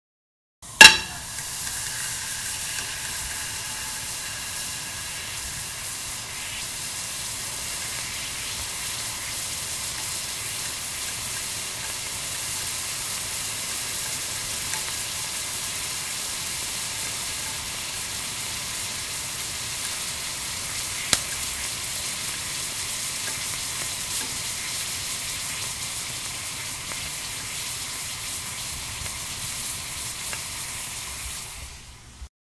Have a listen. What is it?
Melting Ice
Dropped a piece of ice on a hot pan. Close mic of the sizzle and melting that occurs.
boiling, fry, ice, melting, sizzle, water